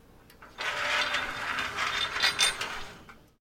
Rusty security gate opening: The sound of more or less slowly opening a closed rusty gate. Loud sounds. This sound was recorded with a ZOOM H6 recorder and a RODE NTG-2 Shotgun mic. No post-processing was added to the sound. This sound was recorded by holding a shotgun mic a bit further away from a closed gate that is busy being opened.
rusty-gate-sounds, rusty-gate-opening, opening-a-rusty-gate, rusty-metal-sounds, gate-opening-sounds, OWI, gate-opening